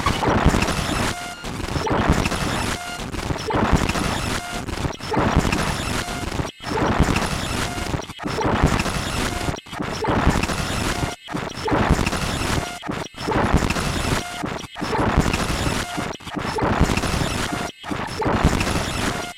rhythmic bright burble n glitch
Casio CA110 circuit bent and fed into mic input on Mac. Trimmed with Audacity. No effects.